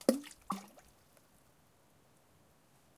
Tossing rocks into a high mountain lake.
bloop, percussion, splash, splashing, water